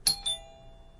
door chime1
chiming, bell, chime, open, ringing, door, house, ring, doorbell, apartment